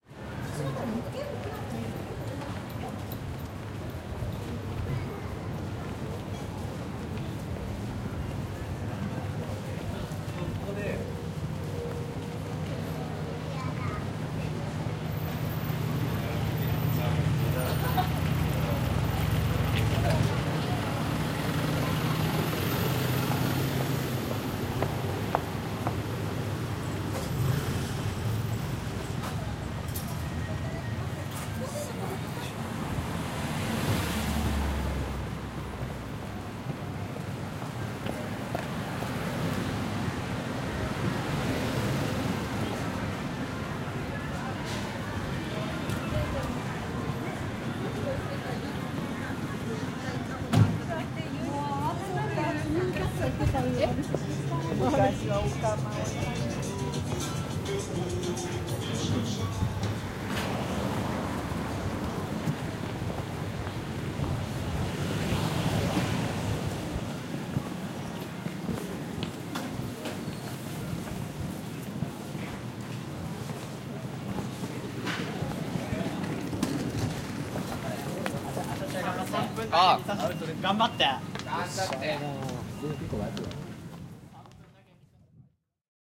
Tokyo - Hiroo street
Walking down the main street in Hiroo on a Saturday afternoon in May 2008. Recorded on a Zoom H4 and is unprocessed apart from a low frquency cut and minor volume automation. General street atmos, footsteps, passing traffic, voices etc.
field-recording, hiroo, japan, japanese, street, tokyo, traffic, voice